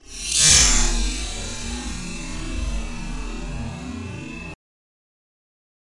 lighters in space
I tried to speed up the sound of a lighter being flicked that I had formerly slowed down (through classic mode on Logic Pro 9) and then this happened. My result was a metallicy, weird, thing.
freaky, MTC500-M002-s14, lo-fi, noise, future, glitch